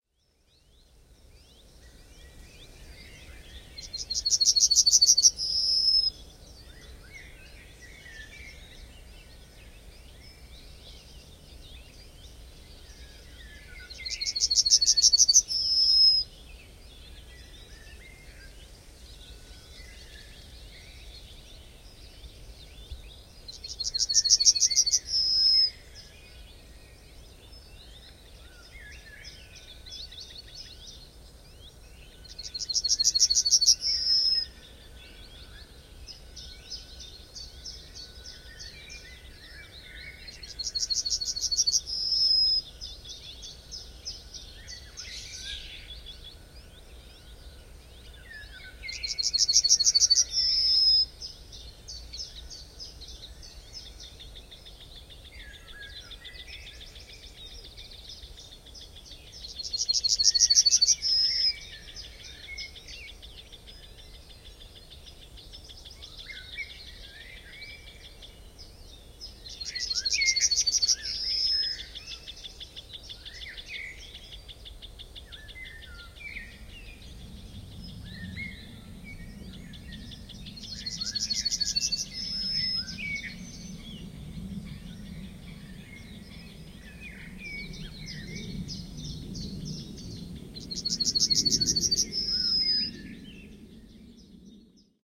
Yellowhammer (Emberiza citrinella) singing it's hammering song on a willow tree near in bush land near a lake north of Cologne. Marantz PMD671, Vivanco EM35 on parabolic shield.
spring, Emberiza-citrinella, birdsong, yellowhammer